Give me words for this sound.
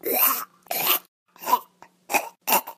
Hope someone else can use it!